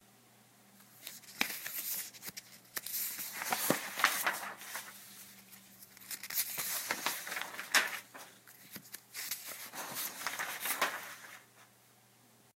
scroll papper
turning pages with rigth hand